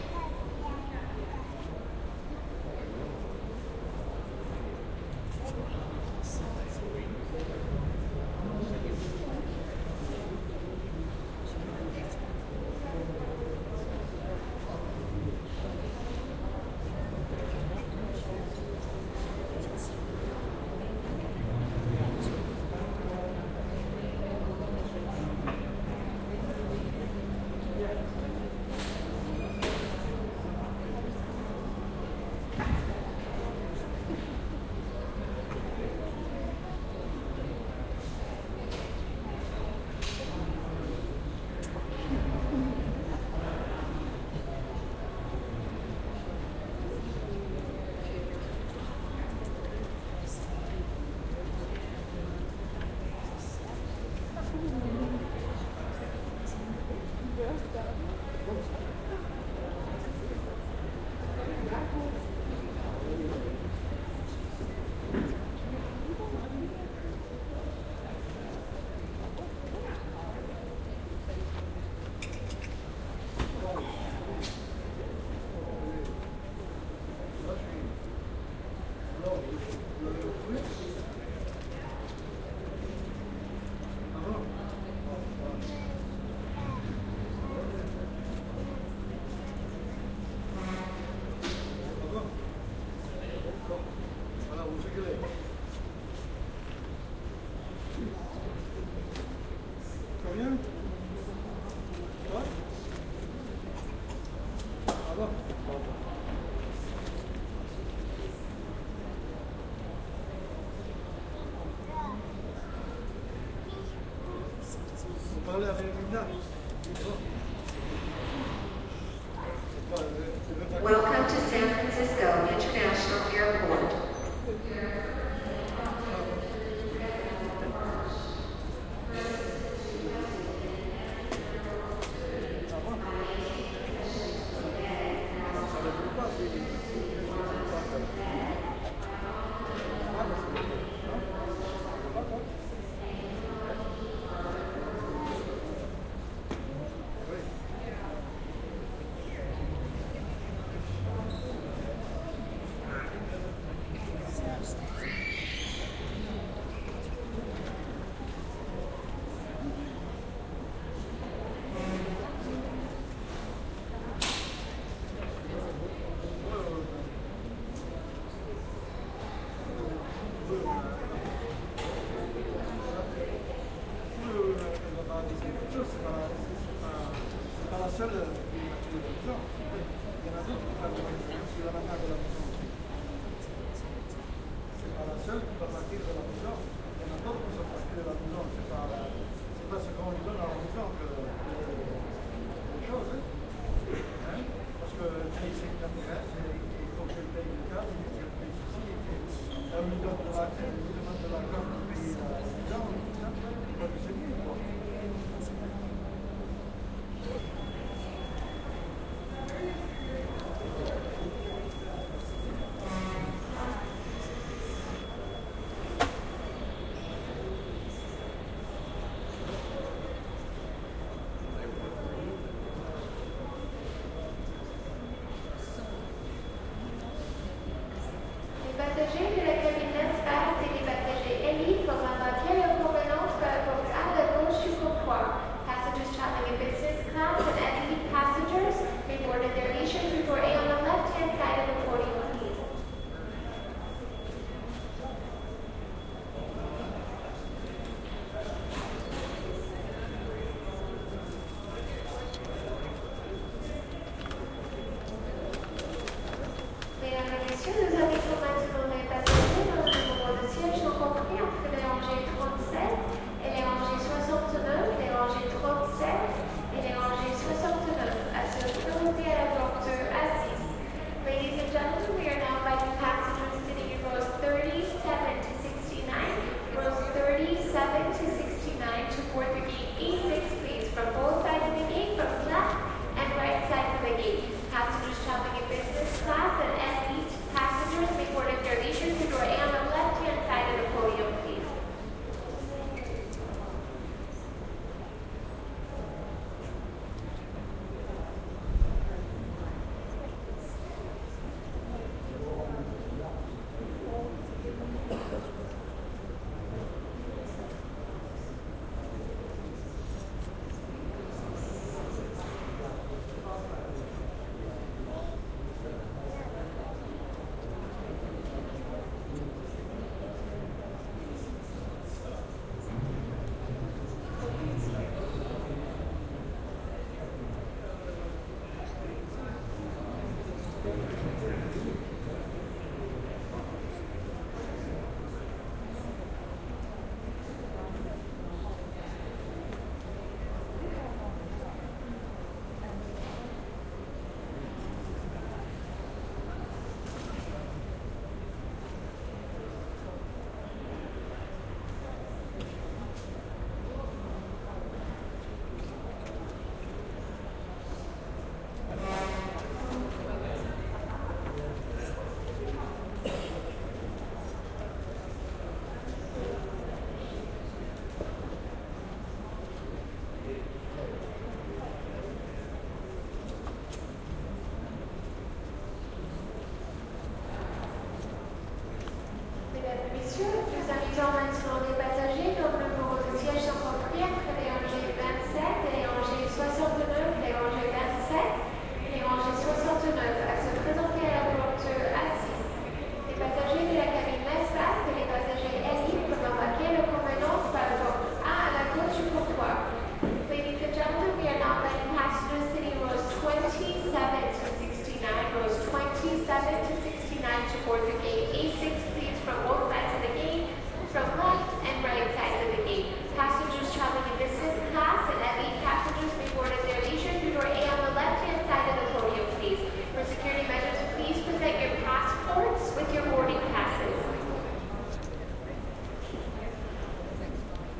SF CA Airport
Waiting in the lobby of San Francisco airport. Some announcements.